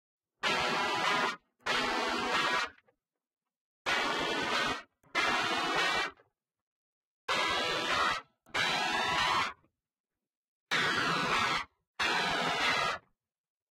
EMshapes2distchopgtr
chords, distorted-guitar, emajor-shapes, guitar, guitar-chords